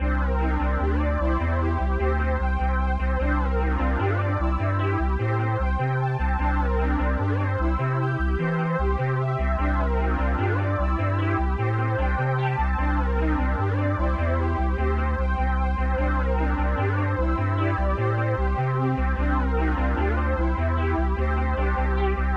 Funky Pixel Melody Loop
Useful for 2d pixel inscreen shopping or skill upgrade menu. On my computer the melody is fine, you don't hear the 1 second high noises. So i think when you download its fine.
Thank you for the effort.
2d, funky, game, melody, pixel, retro